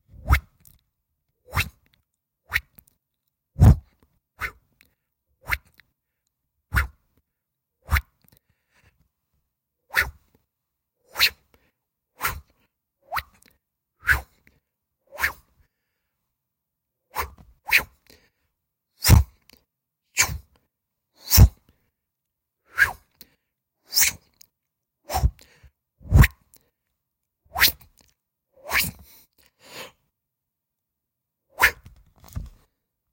Making swishing swipe type sounds into the mic with my mouth.
mouth, swipes, swish